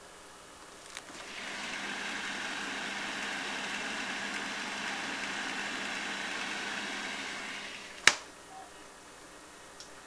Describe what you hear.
open freezer
Opening up a freezer and closing it.
air, dry, ice, opening